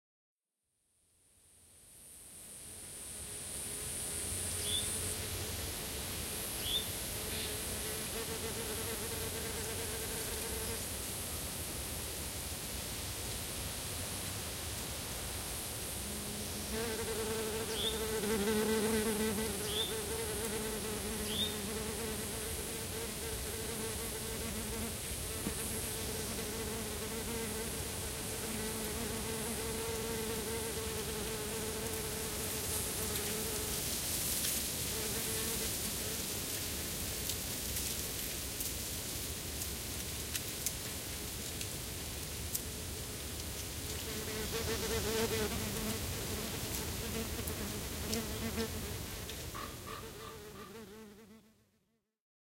Wasp - Harassing left and right microphones
A recording of a wasp harassing the left and right spaced mics. Buzzzzz on the right, then buzzzzz on the left.
Thanks and enjoy.
Birds, Bugs, Buzz, Buzzing, Field-Recording, Forest, Nature, Outdoors, Park, Stereo, Trees, Wasp